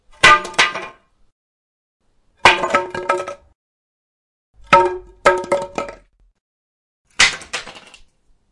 Wood Falling - 4 Drops
Me dropping a wooden batten on my driveway at various heights. I did it around 21:30 so there would be no traffic or bird noises etc. Nice clean sound.
If not, that's fine 😊
The more the merrier. Thanks